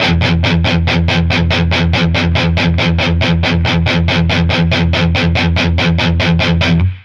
guitar rig3 picked muted riff Emaj 8-4 140BPM
recording on Guitar Rig3 with simulation Messa boogie Rectifier
rig3, guitar